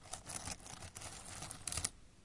Short potpourris rustling sound made by stirring a bowl of it